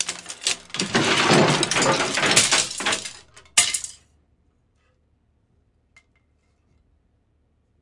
built for a show called Room Service, this cue was one of three choices for an effect. It has coathangers, boxes, etc crashing down.